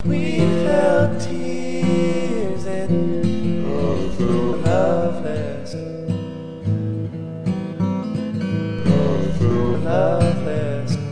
slither of an old song i wrote, intended to loop.
I'm playing a full bodied larrivee acoustic and slowed my voice down a little bit. recorded on a $5 microphone, as always.
"we've held tears and I've felt loveless.."